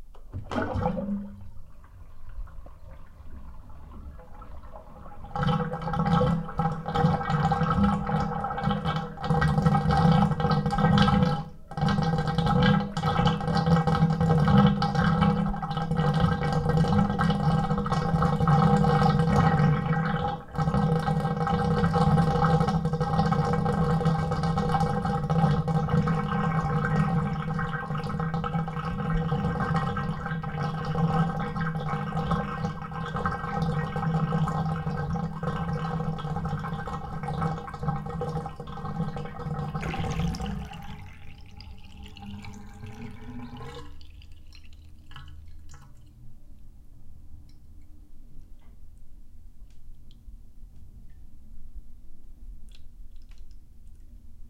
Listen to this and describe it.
Monaural recording of a bathtub draining, from when the drain is opened, until the water has finished draining out. Recorded with a Sennheiser ME66 and a Marantz PMD660. No processing or editing applied.